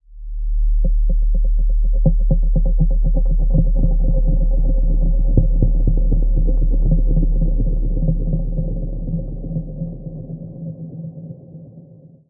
Bass Tension
Sonido que brinda la sensación de tensión y suspenso
atmos, bass, sfx, suspense, tension